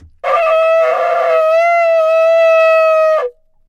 Solo recording of shofar (ram's horn) blasts